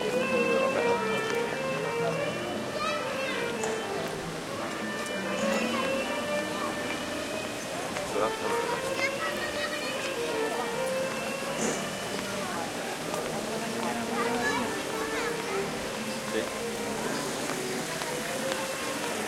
20101023.plaza.nueva
street ambiance with fiddler. Recorded at Plaza de Santa Ana de Granada, Spain with Shure WL183 pair into Olympus LS10 recorder
south-spain, field-recording, fiddler, violin, ambiance, spanish, granada, city, streetnoise